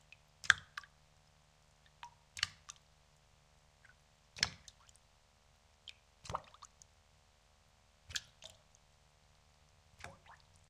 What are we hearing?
Different drops on water
drop, single, water